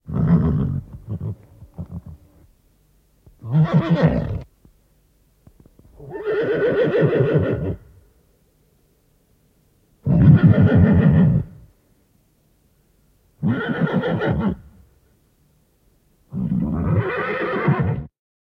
Hevonen hörähtelee / Horse guffaws, a close sound
Hevonen hörähtää muutaman kerran, hörähdys. Lähiääni.
Paikka/Place: Suomi / Finland
Aika/Date: 1965